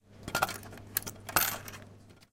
Taking Coins
Taking change coins from a vending machine.
campus-upf, coins, UPF-CS12, vending-machine, hands